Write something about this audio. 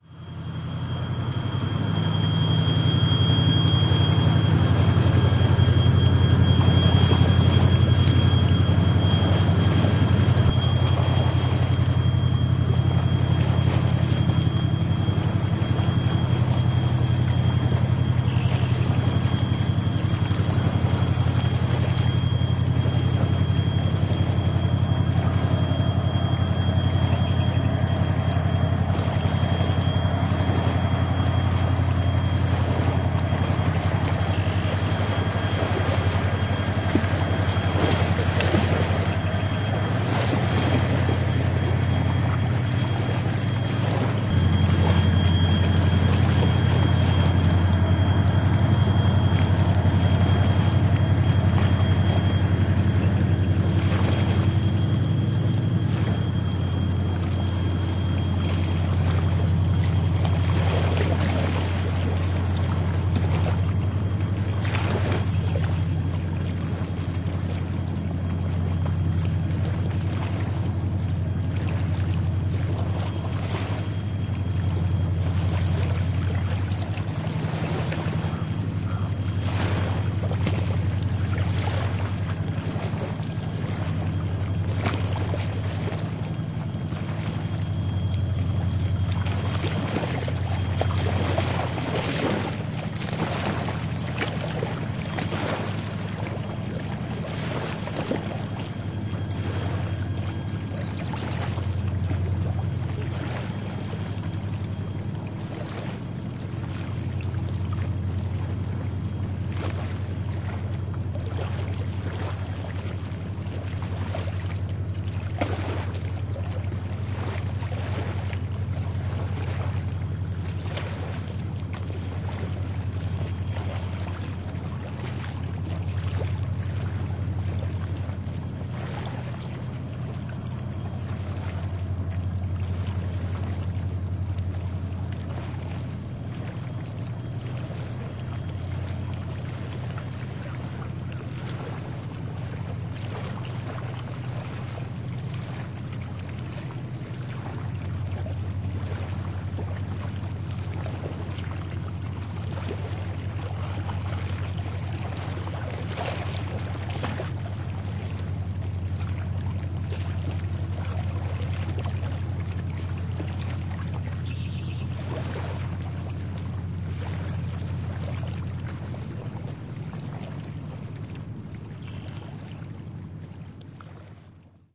This recording was made on a warm, clear day in early June right on the sandy bank of the Mississippi River -- between Illinois and Missouri. You hear the low, strong surge of the diesel engines as a barge slowly passes through and, what I find most enjoyable, you hear the gentle lapping of the river as the waves reach the sandy shore. You also hear various birds, including the common Red-Winged blackbird. Even though this recording was made around 3PM in the afternoon, you also hear a low chorus of insects adding to the relaxing summer-like ambience. A very peaceful, yet strong soundscape of a majestic natural wonder that’s been here for millennia. Recording made with the Zoom H4N, using the built-in internal mics.
field-recording, sound-scape, red-wing-blackbird, river, summer, birds, waves, splash, Mississippi-river, transportation, water, lapping, barge